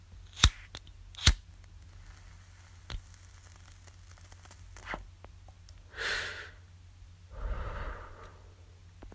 Lighting a cigarette
This is just me lighting a cigrette, taking a puff and blowing it out!
Enjoy!
big sized bic lighter, Marlboro gold cigarette
spark, tobacco, burning, ignition, cigarette, smoking, lighter